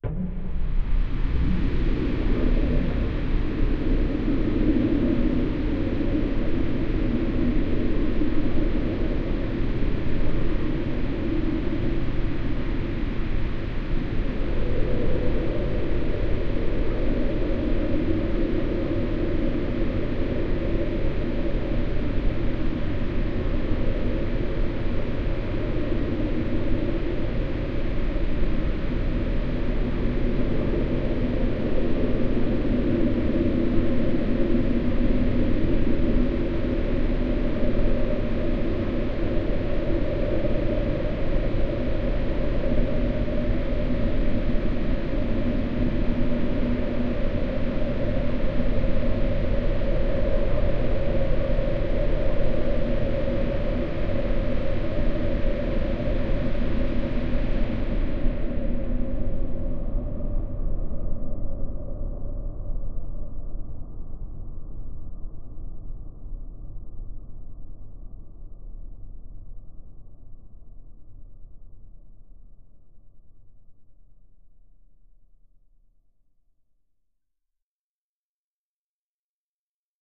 LAYERS 006 - Chrunched Church Organ Drone Pad - B0
LAYERS 006 - Chrunched Church Organ Drone Pad is an extensive multisample package containing 97 samples covering C0 till C8. The key name is included in the sample name. The sound of Chrunched Church Organ Drone Pad is mainly already in the name: an ambient organ drone sound with some interesting movement and harmonies that can be played as a PAD sound in your favourite sampler. It was created using NI Kontakt 3 as well as some soft synths (Karma Synth) within Cubase and a lot of convolution (Voxengo's Pristine Space is my favourite) and other reverbs as well as NI Spectral Delay.
organ, multisample, artificial, drone, pad, soundscape